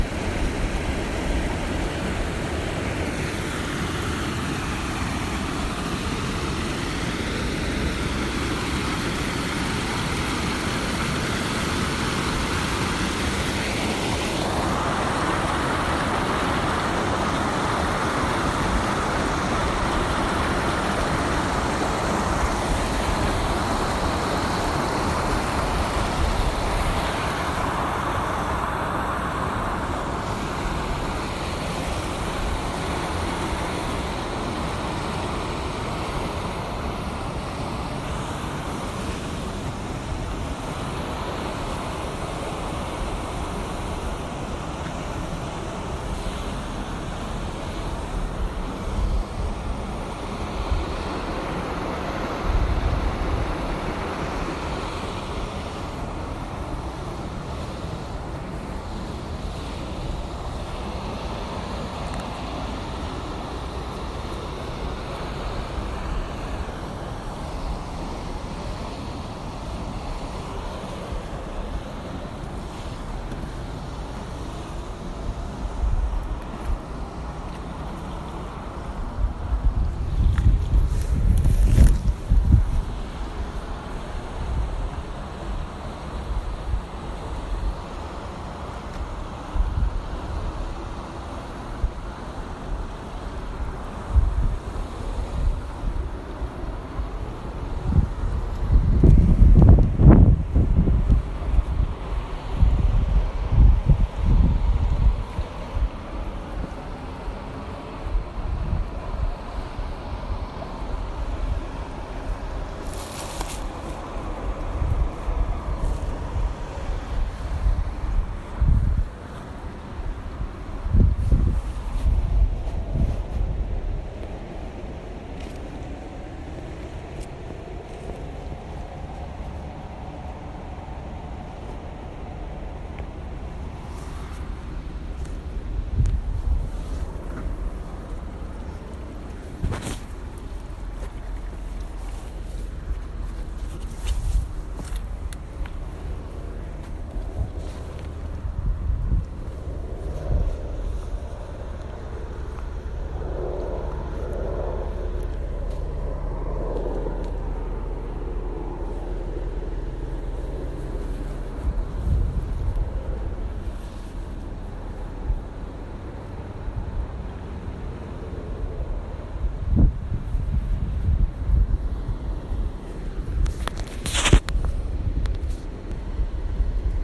Monument Creek (Rough Field Recording)
A recording made near Monument Creek. I needed to block wind noise and so there are strange fluctuations with the EQ, although maybe this is a feature and not a bug for someone out there. I walked away from the rapids until I was about twenty feet away. At one point, a helicopter passed over, and you may hear a motorcycle ride by.
field-recording, river, colorado-springs, nature, creek, stream, water